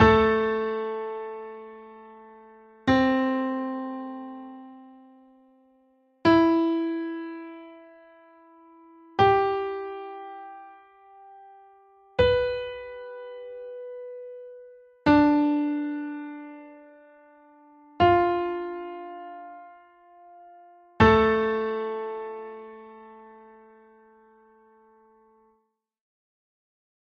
A minor Aeolian in stacked thirds
aeolian, minor